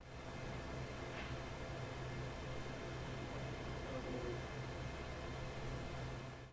routers-noise
The noise of a group of routers.